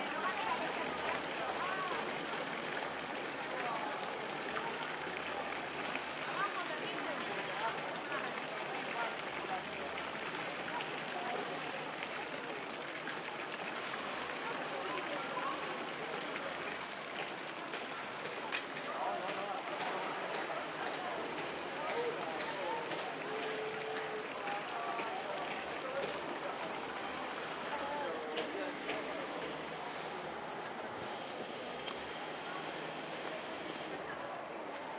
Ambience reccorded on plaza das platerias on Santiago de Compostela outside the cathedral
MONO reccorded with Sennheiser 416 and Fostex FR2